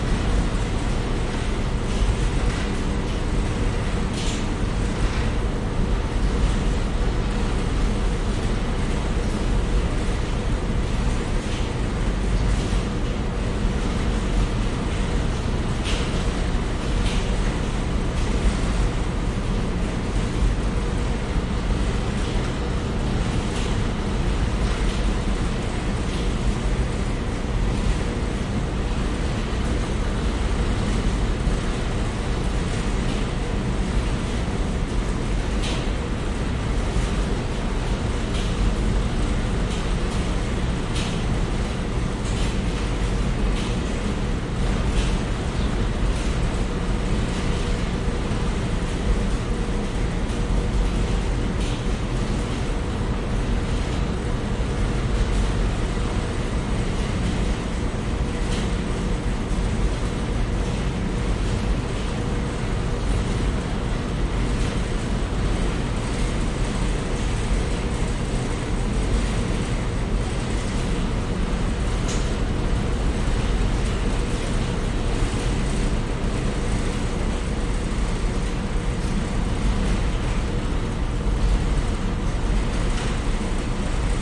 laundromat washers washing machines rattle vibrate1
laundromat, washers, vibrate, rattle, machines, washing